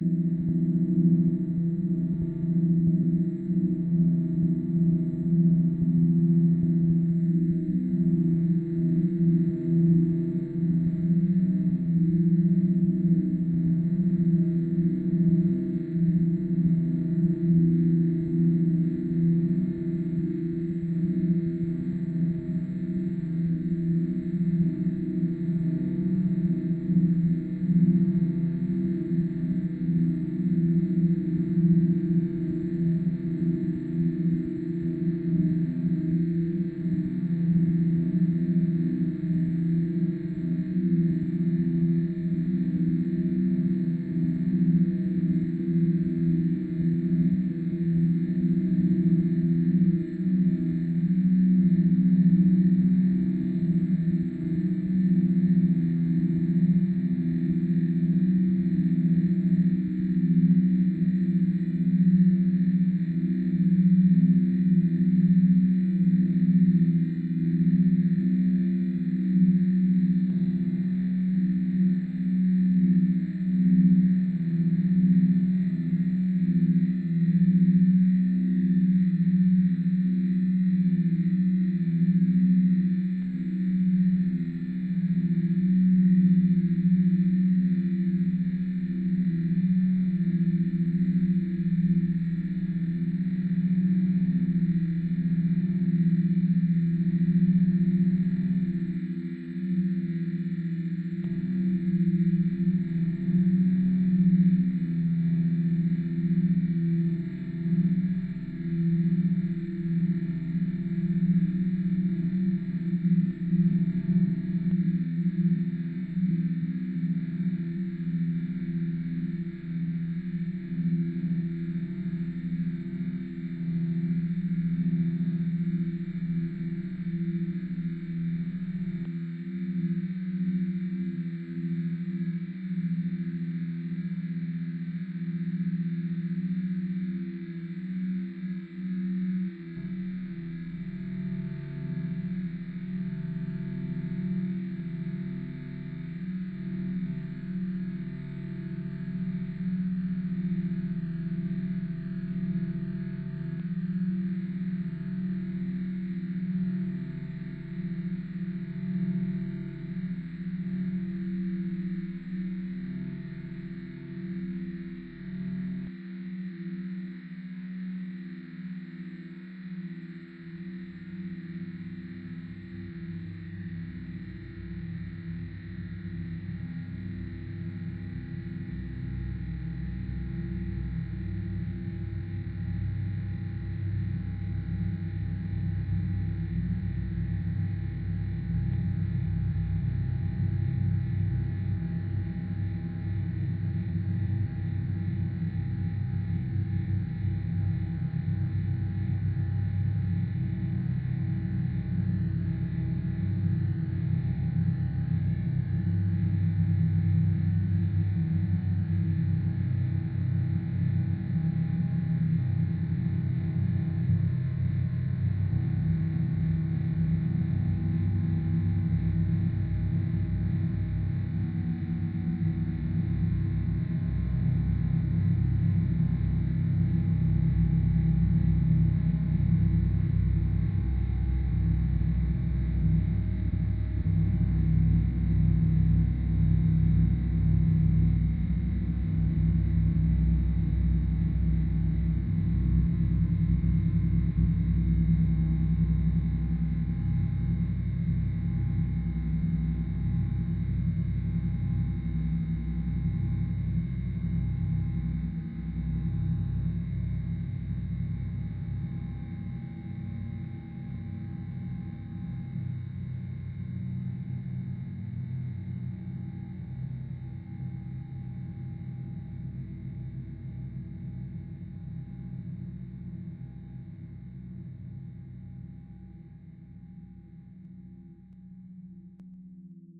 drone-bell-ambience-glitchy
Glitchy drone ambience with layered bells.
ambient, atmospheric, background, meditation, noise, soundscape, spiritual